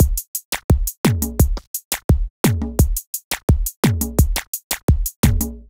Wheaky 2 - 86BPM
A wheaky drum loop perfect for modern zouk music. Made with FL Studio (86 BPM).